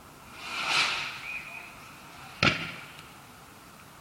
A single, close firework
A single firework explodes in the street, quite close to me. Rotherham South Yorkshire 31 Oct 2014.
expolosion, field-recording, firework